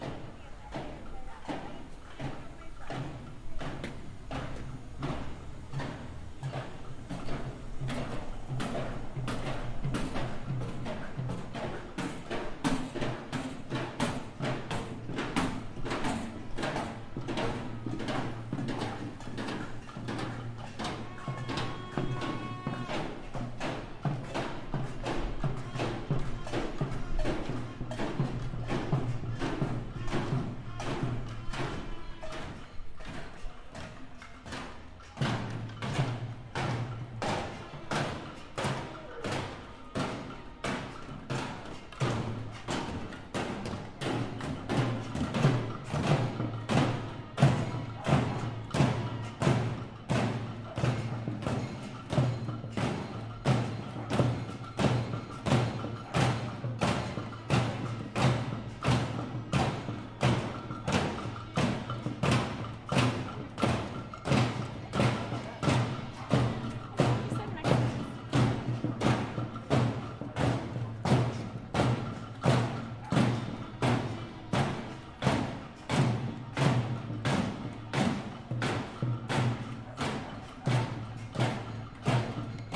Street protest due to electrical power shutdown, Buenos Aires, Feb. 2015
batucada, drums, gritos, protesta, Riot, street-protest